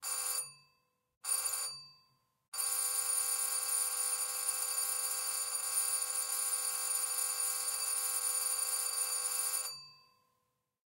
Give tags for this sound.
doorbell
INT
old
ringing

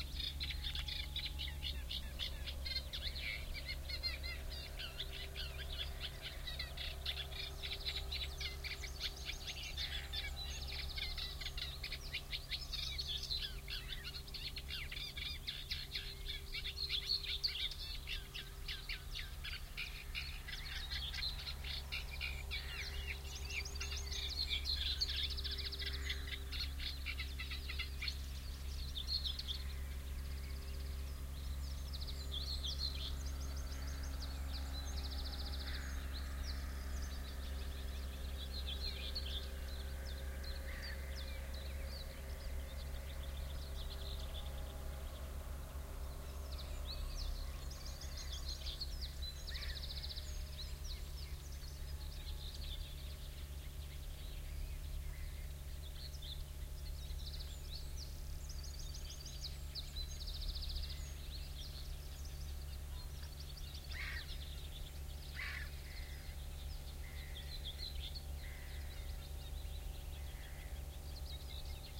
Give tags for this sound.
denmark
marbaek
binaural
reed-warbler
reed
birdsong

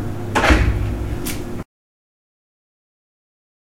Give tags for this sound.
open
pantry
door

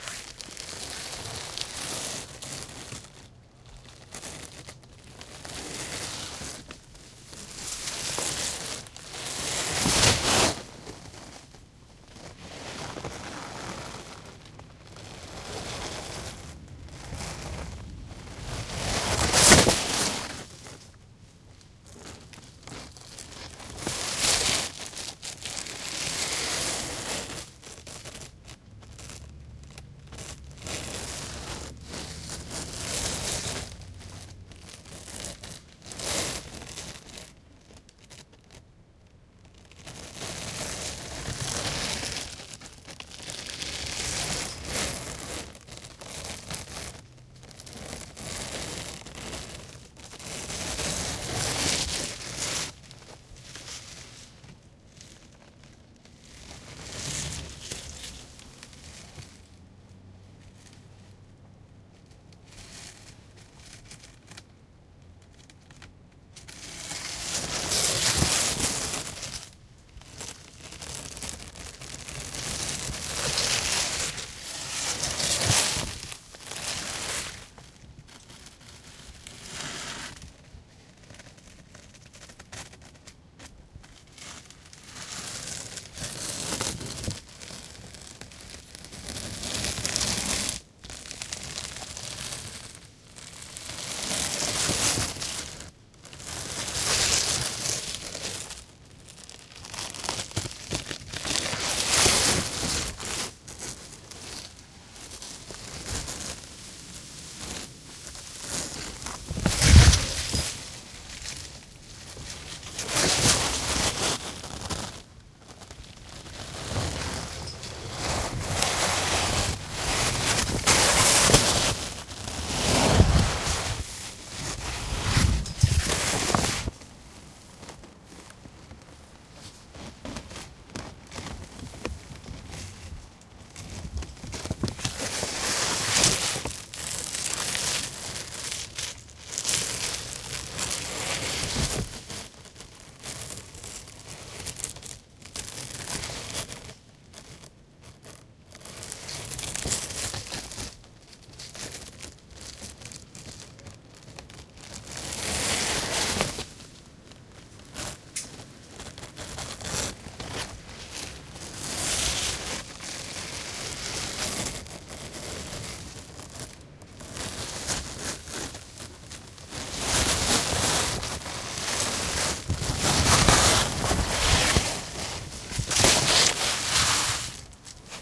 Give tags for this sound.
car
closeup2
flap
plastic
shelter
tent

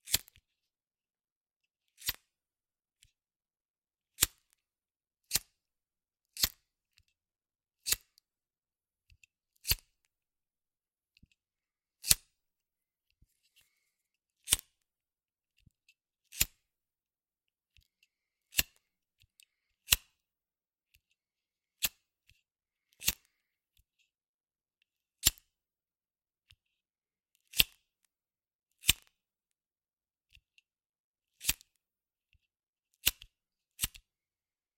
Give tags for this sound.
ignite
spark
recording
onesoundperday2018
ligther
fire

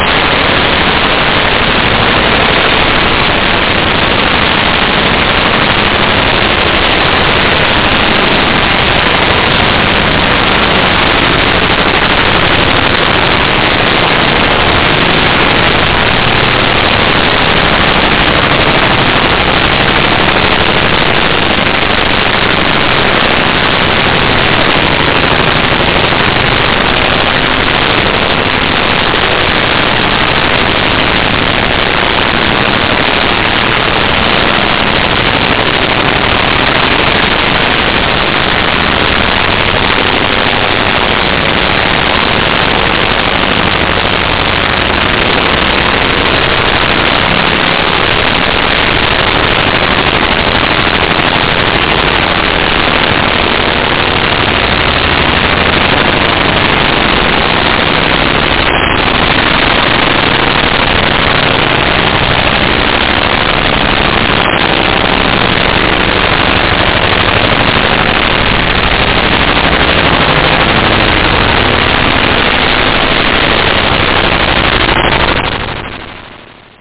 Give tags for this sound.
audio-paint
computer
cyber-waterfall
dare-26
data-pipe
digital
digital-raspberry
electronic
glitch
harsh
image-to-sound
lo-fi
noise
rainbow-over-hell
scifi
synthesized

